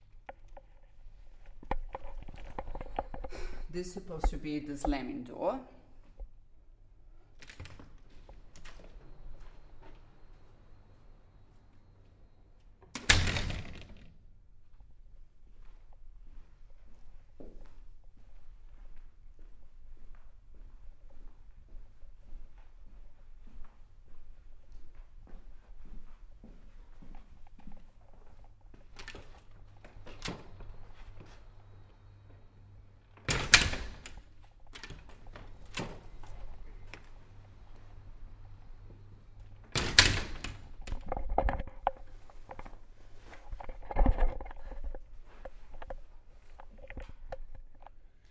door slam
reverb, slam, door, melbourne, australia, victoria, foley, melbournepolytechnic, production, sound